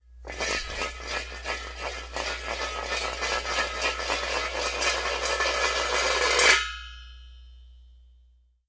Hubcap rolling away from a car crash and rattling and clanging as it comes to rest. Actually, I needed the sound of a hubcap to add to an auto accident crash sfx and recorded this POT LID in my basement using my laptop computer, Audacity, and an inexpensive condenser mic. Hubcap1 is the pot lid rattling around and coming to rest on the cement floor in my basement. (The mic was further away from the POT LID for Hubcap1.)